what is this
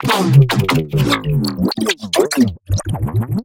glitch grains midbass 1
freaky, digital, bent, heavy, glitch, midbass, circuit, dubstep, riddim